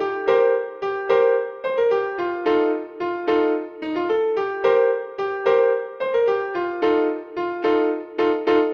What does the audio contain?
A member of the Delta loopset, consisting of a set of complementary synth loops. It is in the key of C major, following the chord progression C7-F7-C7-F7. It is four bars long at 110bpm. It is normalized.
110bpm, piano